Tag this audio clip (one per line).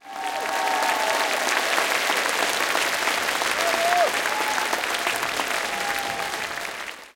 applaud
applauding
applause
audience
auditorium
group
hand-clapping
Holophone
theatre